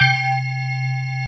Fabulubells 3 Loop
Made up by layering 3 additive synthesized spectrum sounds ran them through several stages of different audio DSP configurations. FL Studio 20.8 used in the process.
ring, synth, organic, natural